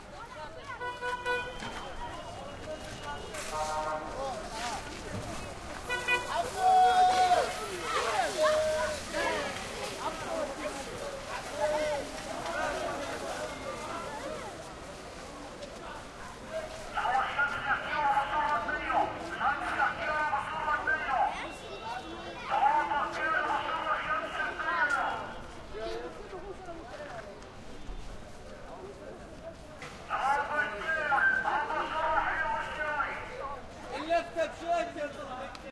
street vendor selling oranges shouting into mic PA like prison guard by street market with traffic2 Gaza 2016
shout, Palestine, vendor, arabic, street, Gaza